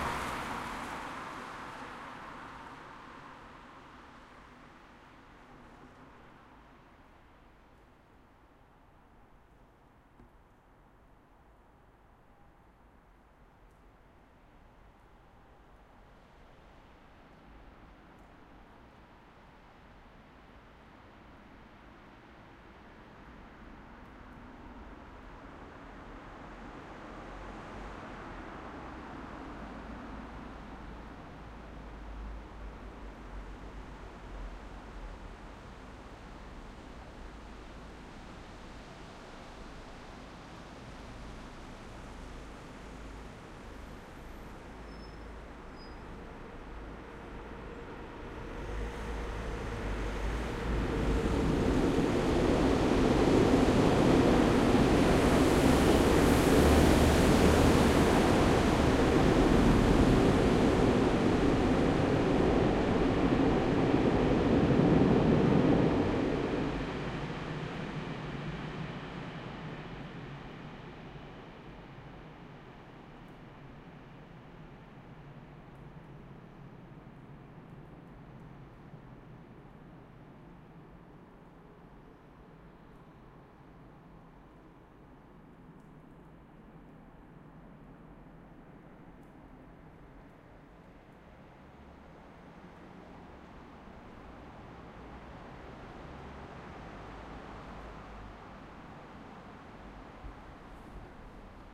traffic-train
A car is turning on the corner and then a train comes really close.
Buchheim, cars, train